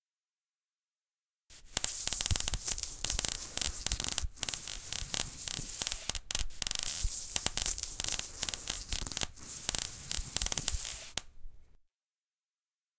Sound of welding. Made by recording a marble dropping onto tile and rubbing my finger on styrofoam.
sparks, weld, welding